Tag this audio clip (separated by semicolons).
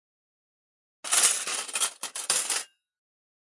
dinner; fork; selecting; tray